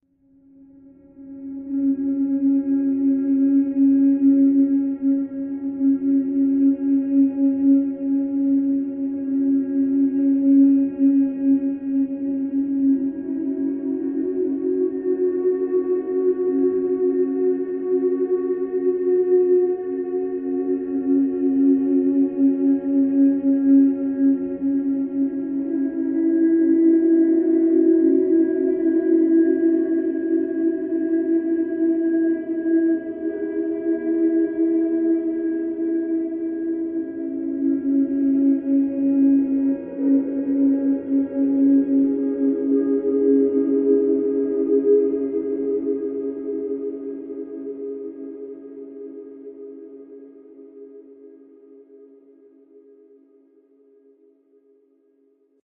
ghostly flute-like ambient soundscape